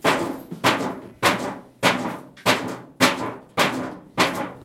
Metal Jar Banging
Bang, Boom, Crash, Friction, Hit, Impact, Metal, Plastic, Smash, Steel, Tool, Tools